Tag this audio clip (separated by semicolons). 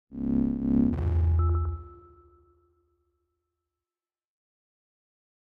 delay; slow-release; processed; sound; pan; explosion; distortion; synthesis; electronic; panning; medium-attack; filter